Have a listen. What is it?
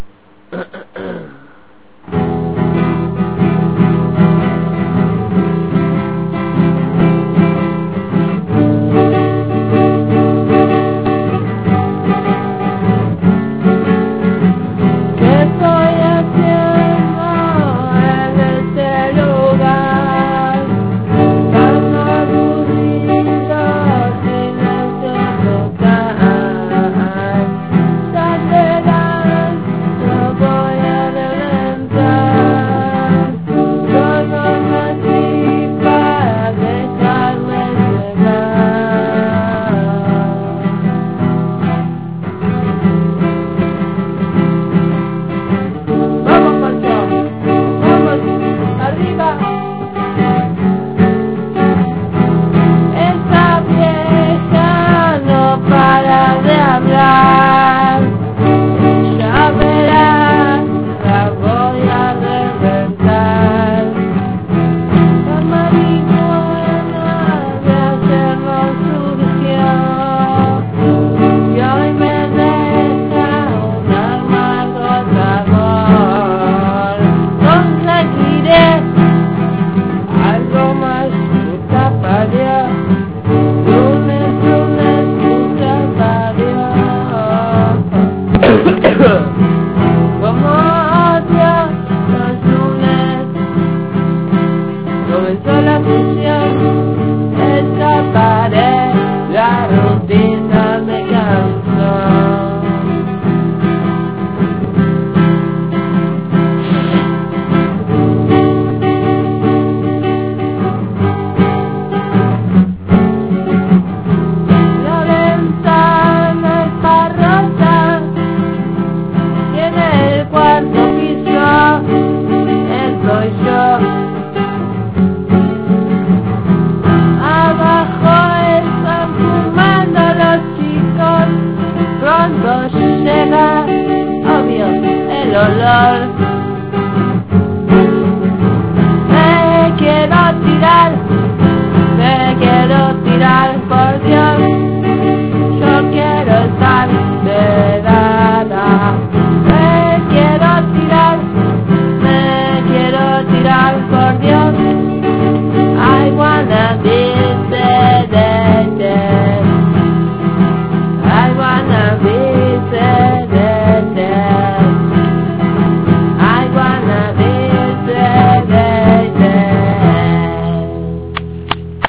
Lamentos En El Aula
a song in Spanish for all the world yonkies from a free rebeld
cries, fidelity, lo-fi, low, matrero